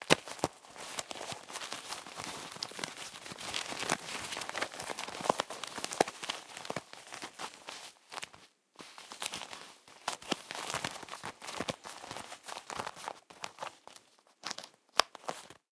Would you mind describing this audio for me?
Checking a medium-sized leather wallet, sounds of leather and buttons/zipper being rubbed/squeezed.